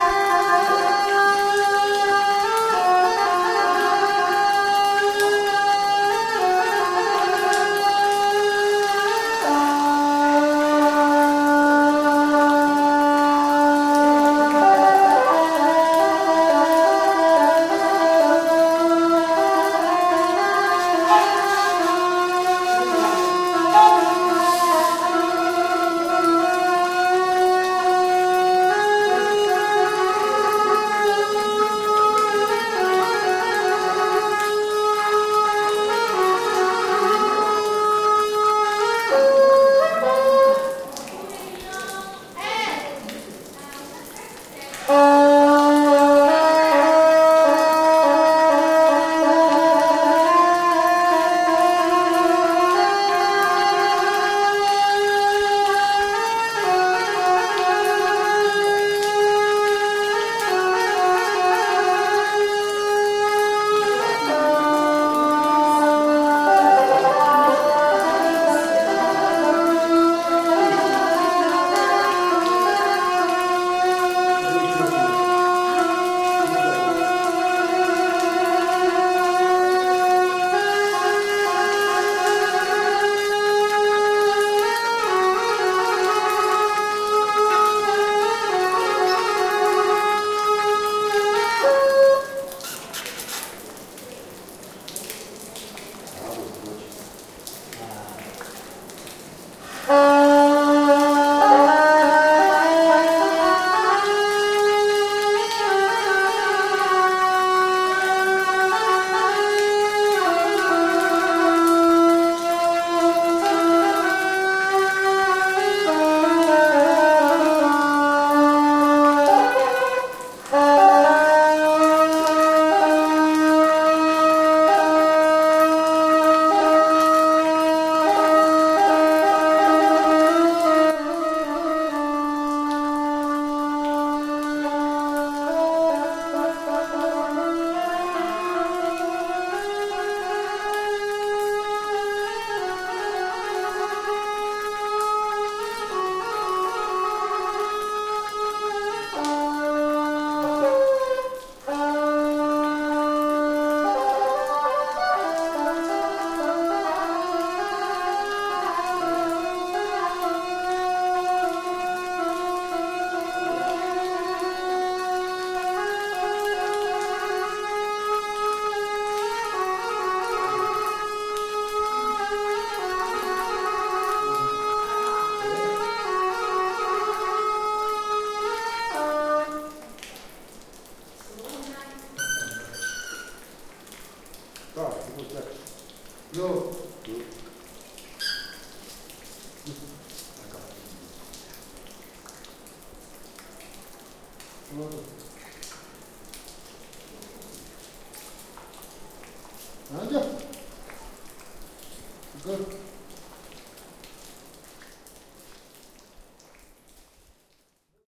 Buddhist Monk plays traditional horn (Gyaling) inside a cave in Langmusi, China
buddhist, cave, china, field-recording, gansu, Gyaling, horn, langmusi, monk, practice, rehearsal, reverb, tibet